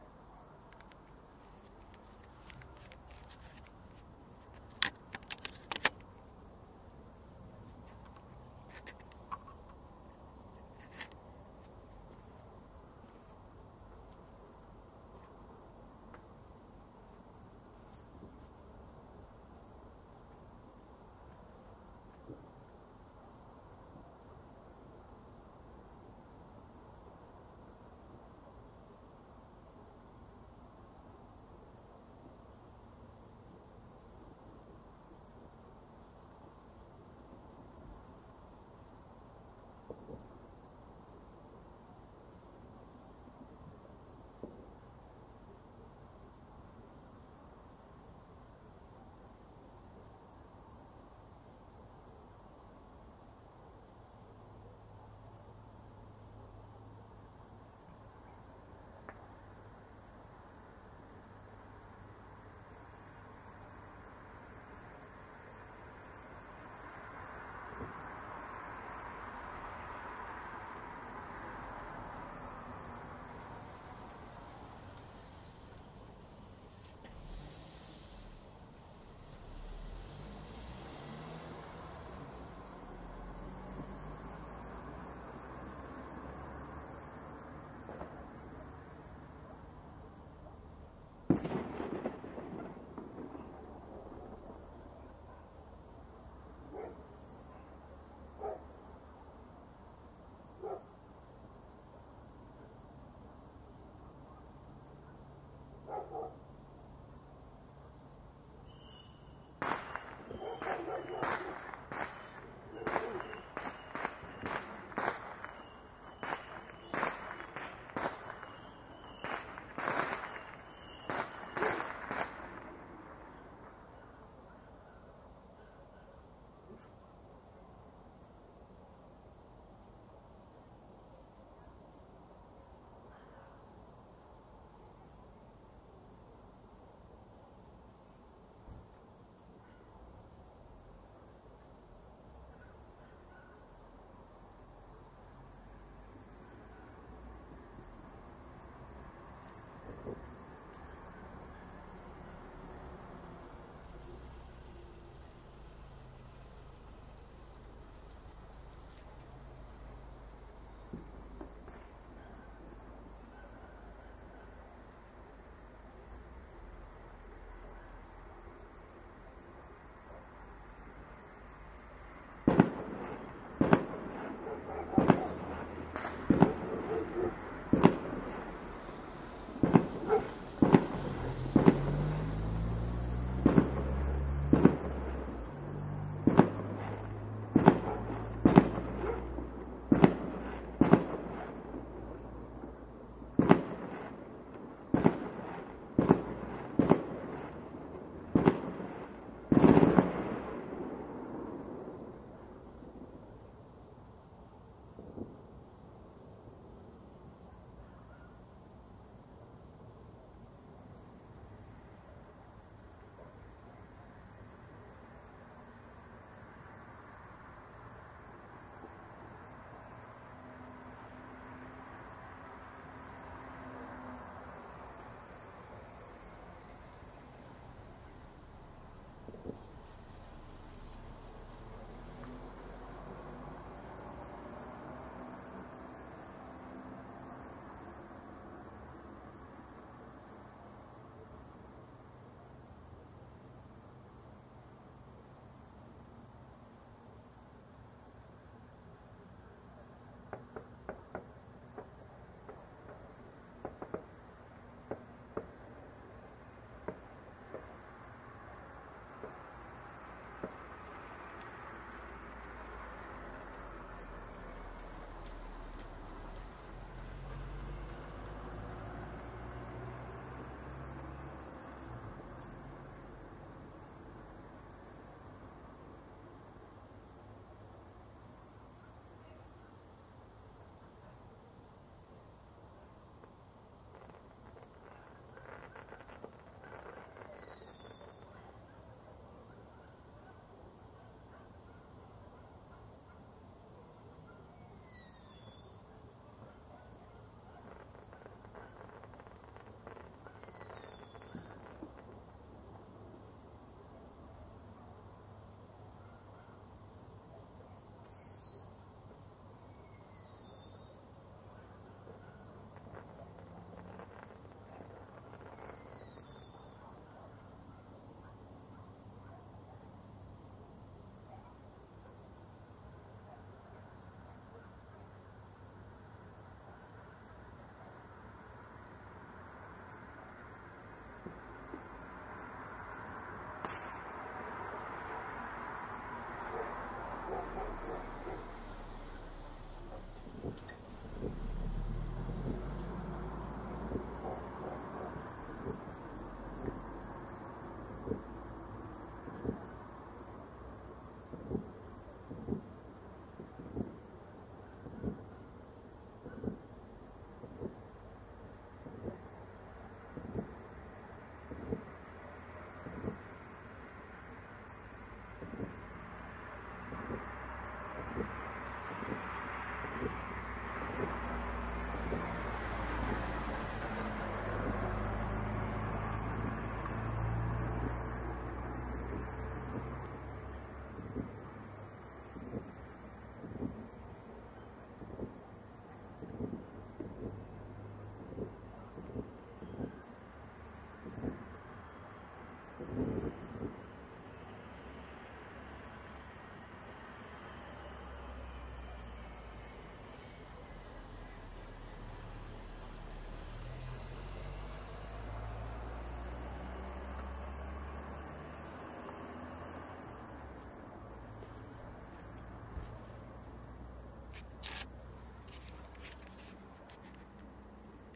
I recorded some fireworks on New Years Day by my SONY stereo dictaphone.
boom, explosion, firework, fireworks, happy, new, rocket, year